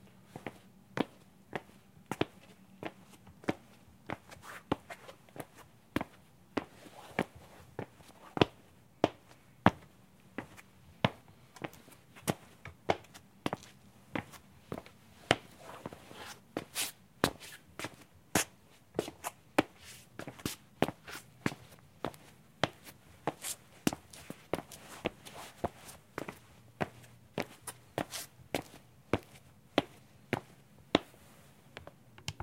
light footsteps on concrete walking
Sounds of light footsteps on concrete